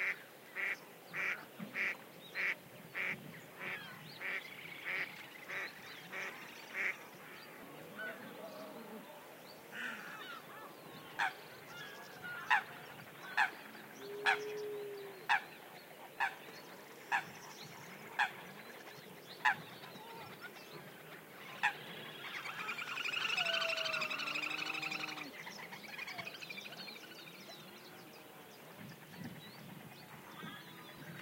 20080302.pond.watermarked
The watermark is NOT AUDIBLE but you can see it looking at the spectrogram. In Audacity, for example, select the spectrum view instead of the more commonly used waveform view. Or if this sounds to complex just see a screen capture:
The original stereo audio file was produced with GNU/GPL Enscribe 0.0.4 by Jason Downer, then converted to converted to a single channel with Audacity
birds, enscribe, nature, steganography, digital-watermark, south-spain, field-recording